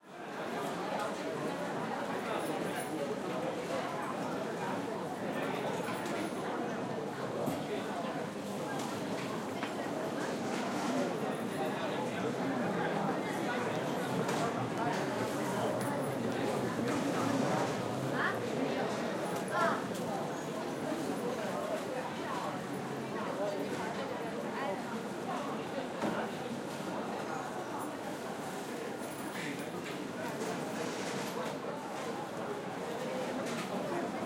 Aeroport-Chinois embarq(st)
Some Chinese at boarding in Roissy Charles de Gaulle recorded on DAT (Tascam DAP-1) with a Rode NT4 by G de Courtivron.